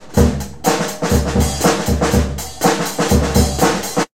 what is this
In this recording you can hear me playing the drums. It is a very bad recording because my equipment is not the best at all and I recorded down in my cellar where the acoustic is not very good!